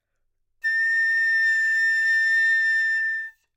Piccolo - A6 - bad-dynamics
Part of the Good-sounds dataset of monophonic instrumental sounds.
instrument::piccolo
note::A
octave::6
midi note::81
good-sounds-id::8553
Intentionally played as an example of bad-dynamics
A6, good-sounds, multisample, neumann-U87, piccolo